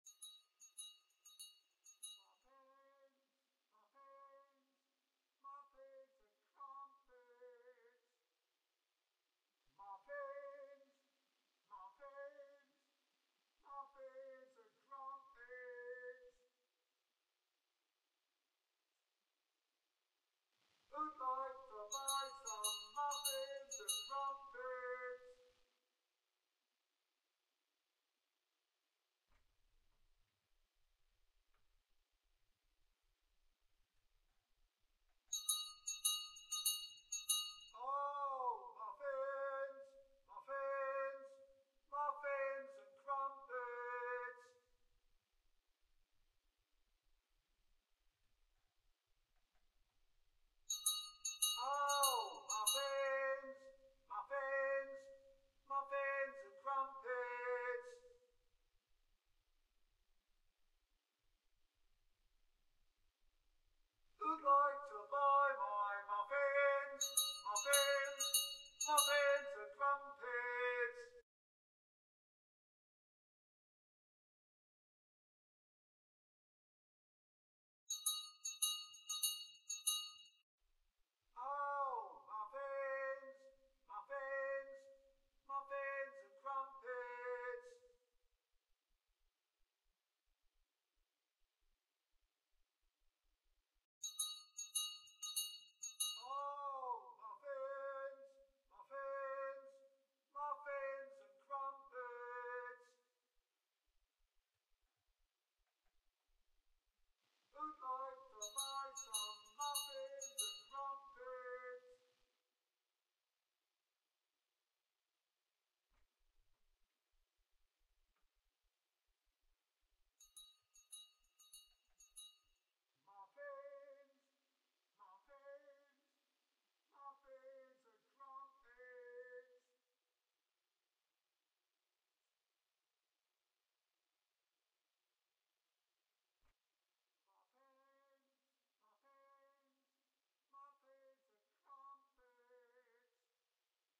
Vicotrian street muffin vendor
Victorian street muffin vendor with bell, approach, pass, recede
Crier, Drury-Lane, London-street-crier, muffin-man, muffin-vendor, Street-crier, street-vendor, Victorian, Victorian-London, Victorian-street-cry